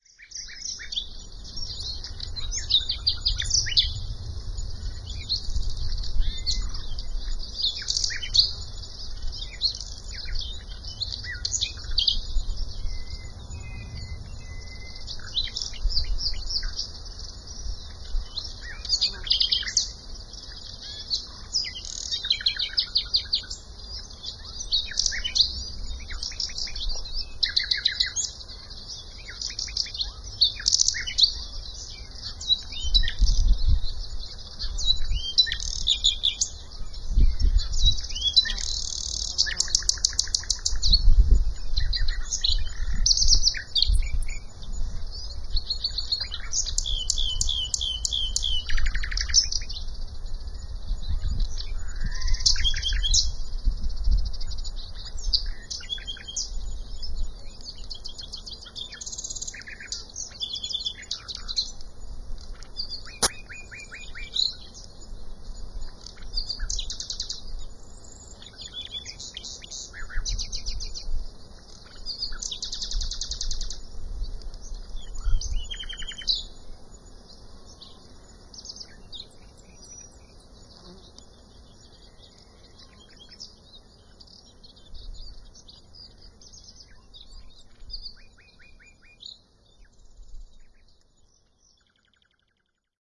Not one but two nightingales in the ravine that runs close to our house. Recorded on a Panasonic Mini DV Camcorder and a cheap electret condenser microphone.
spain, birdsong, nightingale